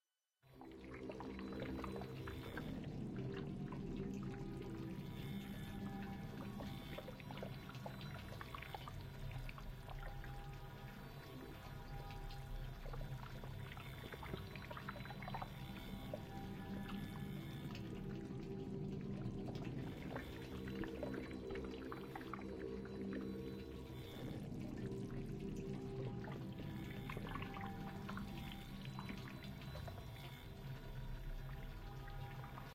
Bio Life Signs Core
This version adds the imaginary approach to something large alien, mechanical or both in the jungle.
alien, atmospheric, jungle, organic, squelchy, synthetic, world